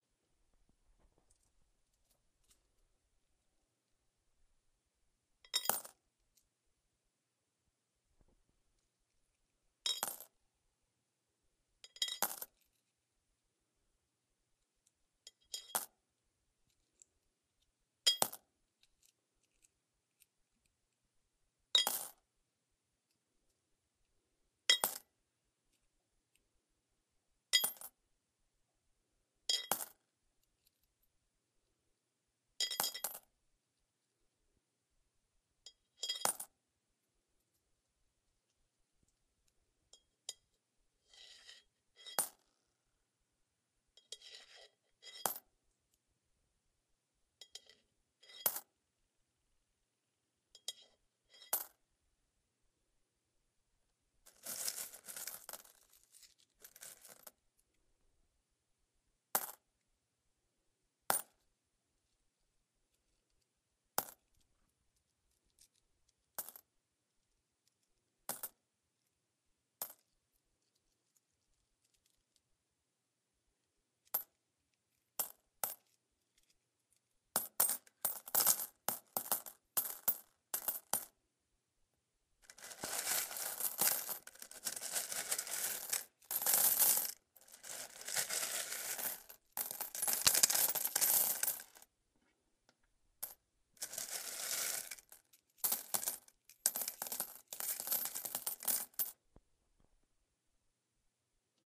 U.S. Coins sliding across metal and into metal pan full of coins. I recorded it to recreate a parking meter coin drop. Many variations in the audio file. Recorded on a H4N with an EV-635 mic.